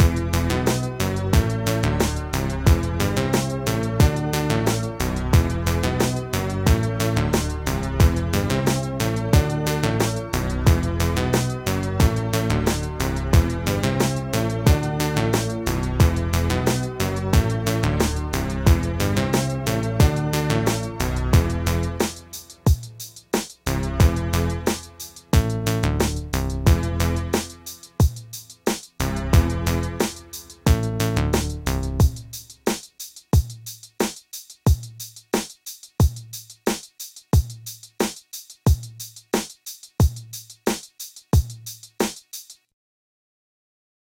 I made this simple loop. For me it's sounds like some game soundtrack.
heroes, Background, brave, soundtrack, minimal, videogame, Game, Loop